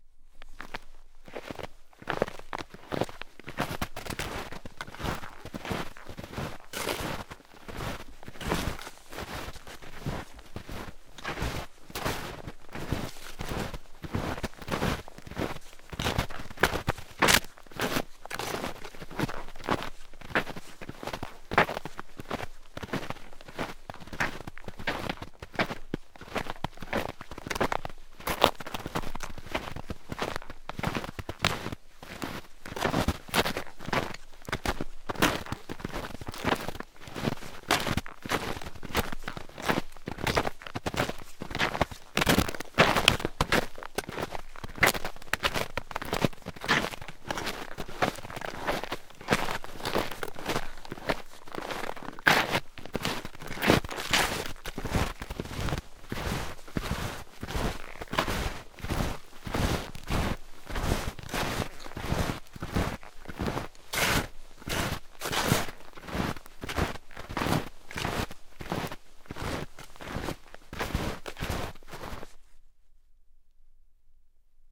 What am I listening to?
snowshoe steps 01
Walking around on various snowy surfaces in a pair of snowshoes. Some snow is soft, some crunchy, some icy. Recorded with an AT4021 mic into a modified Marantz PMD661.
winter, foley, nature, crunch, outside, snowshoes, ice, snow, footsteps, field-recording